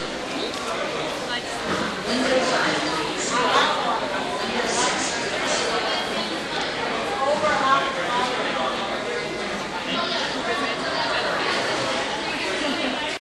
nyc esb ticketwindow2
The ticket window at the Empire State Building in Manhattan recorded with DS-40 and edited in Wavosaur.
empire-state-building, field-recording, new-york-city